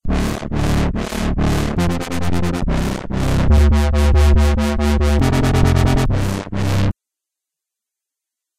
wobble bass
This is my first, "wobble" bass experiment. tell me what you think of it!it's at 140 BPM.